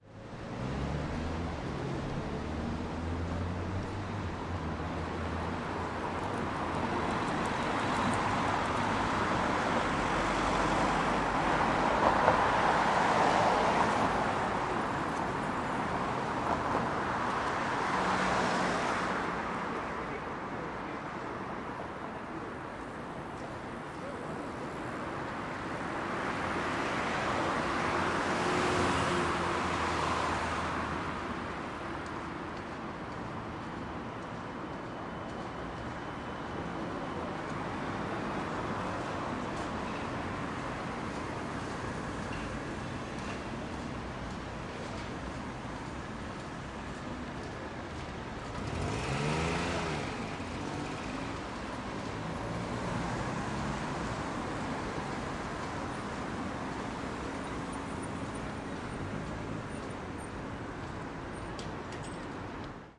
City ambient 02
ambience, Budapest, street